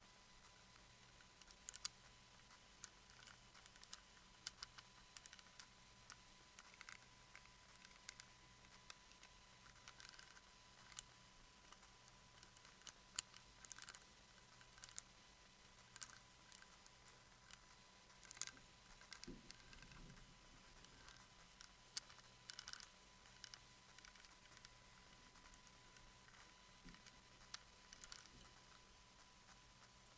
This a simple sound I recorded from my "Master Lock Hard Case" combination lock. I used Audacity for noise reduction and editing. I couldn't find a good, free combination lock sound, so I decided to make my own :)
turning, knob, nob, combination, door, combo, device, lock, close, safety, key, safe, click, master-lock, open